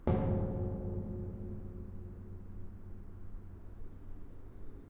random sign i stroked with my hand on a walk